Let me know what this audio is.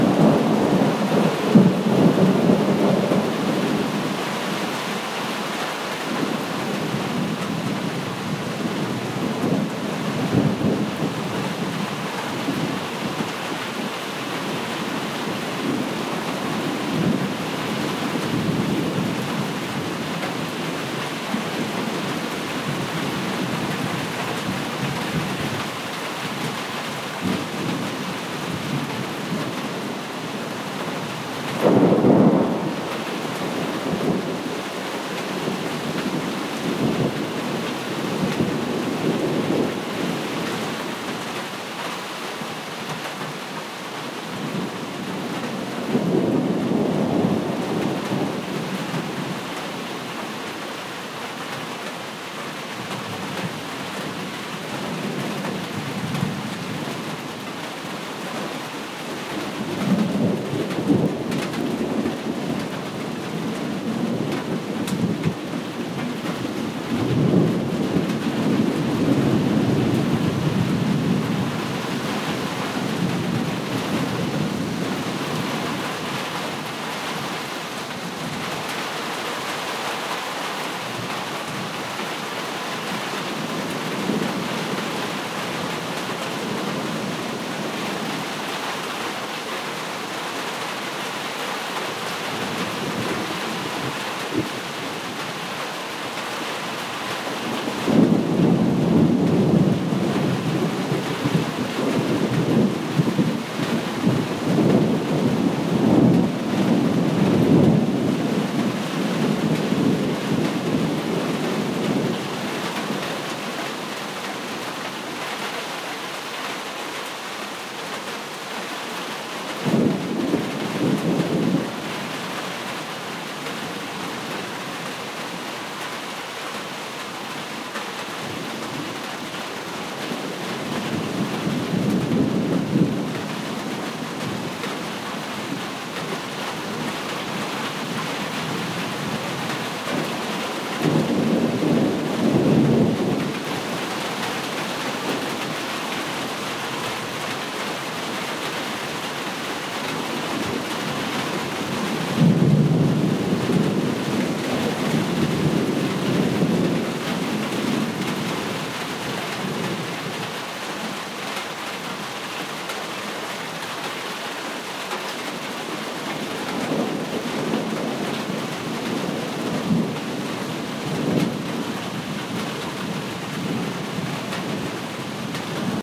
Rain and thunder.